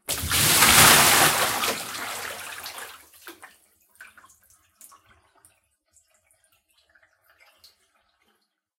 Water splash, emptying a bucket 8
I was emptying a bucket in a bathroom. Take 8.
bath, bucket, drops, hit, water